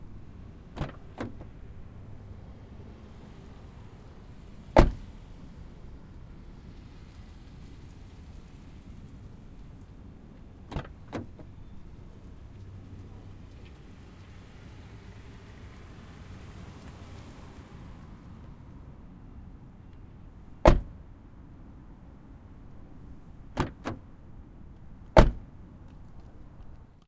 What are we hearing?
Exterior Prius door opens and close with amb car bys
Toyota Prius C door opens and closes with various car bys, medium to far perspective.